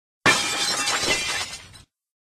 window breaking
the sound of a window shattering
shatter, broken, glass, window, break